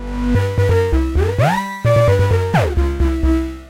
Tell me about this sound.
High Resonance Bass Pattern 4
wobble, dark-bass, sub, ni-massive, funky, 130bpm, bassline, bass-synthesis